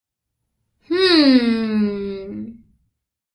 evil idea sound
cute, evil, idea